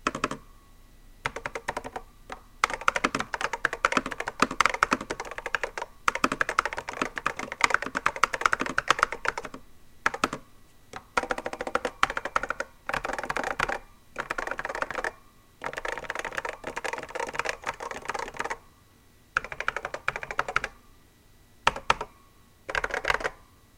Keyboard Typing Sounds
Typing sounds from a LANE telecommunications 700 System keyboard. It uses ALPS SKCCBJ switches.
keyboard, keystroke, typing